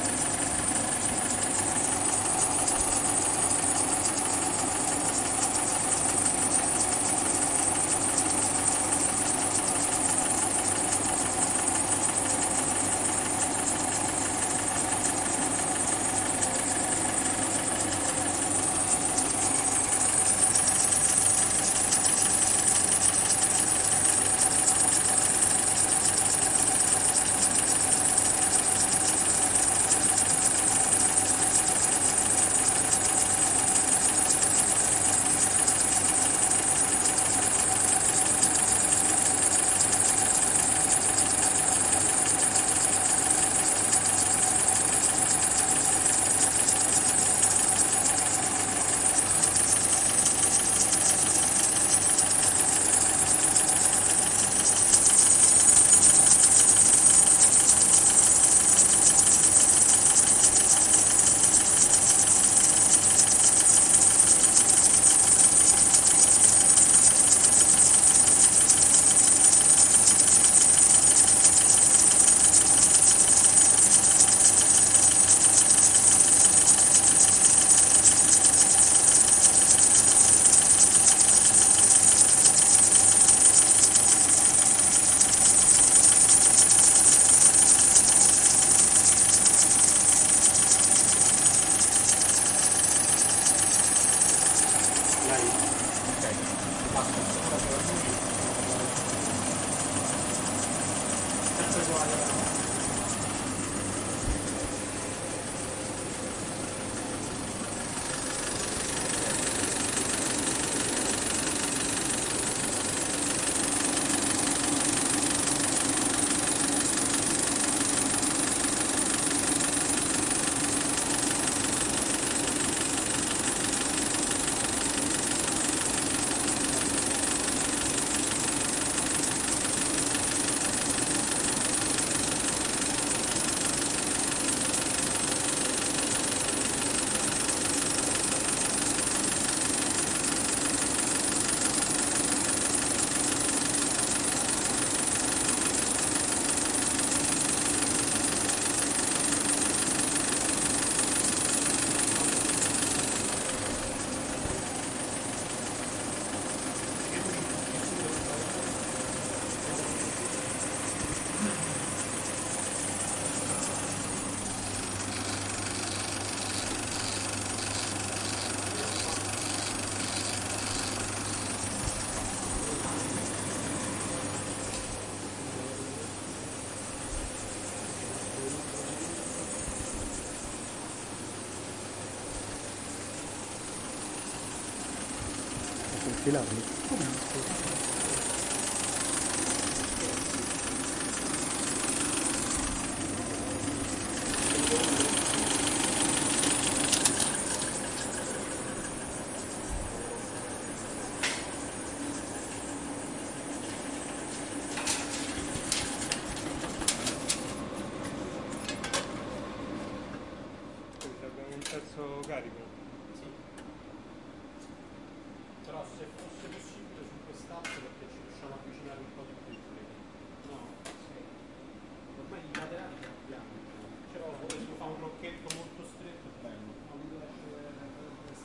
film projector 01
film, cinecitt, projector